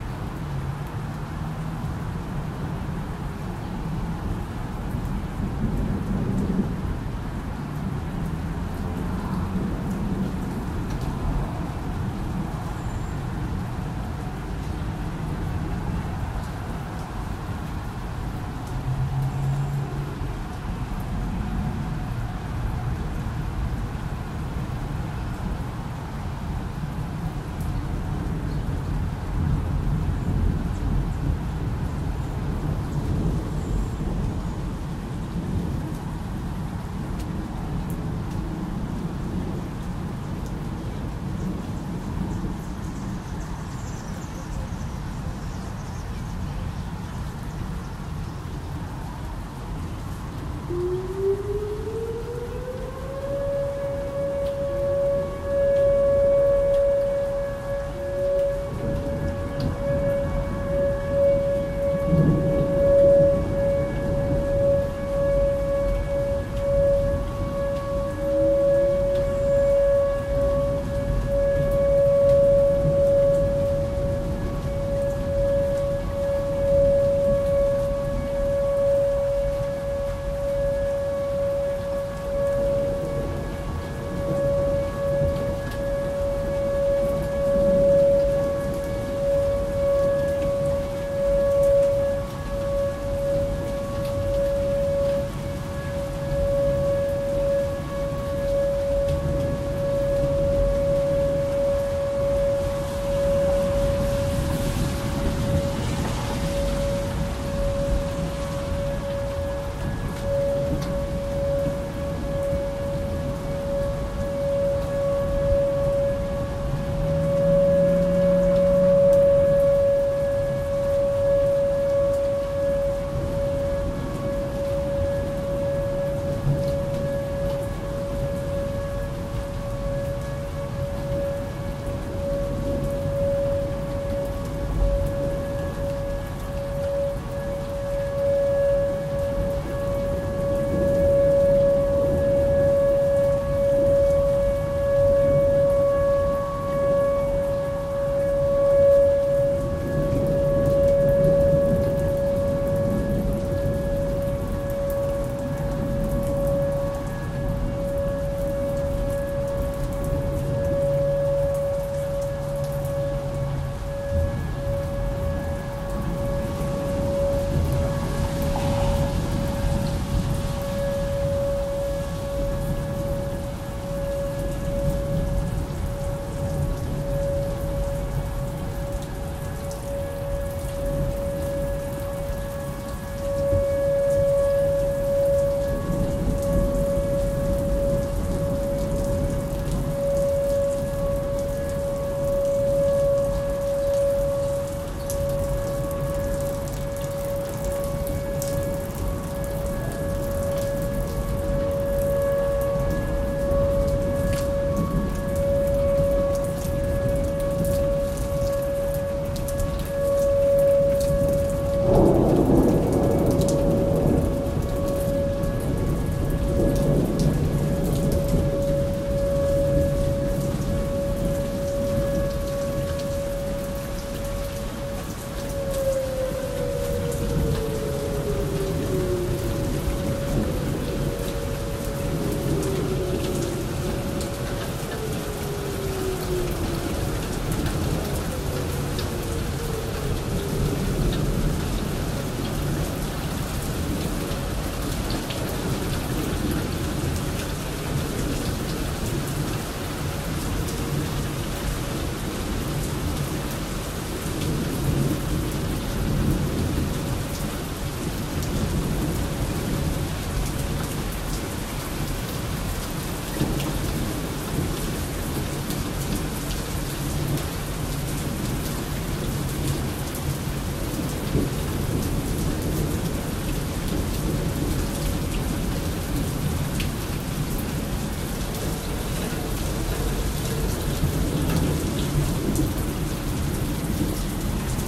Tornado Sirens - Tulsa
Tornado sirens running on April 28, 2020 in Tulsa, Oklahoma. Recorded while standing under a carport. Started recording at the end of one cycle, some time passes and then multiple tornado sirens in succession begin to blare across the city.
disaster, nature, siren, storm, weather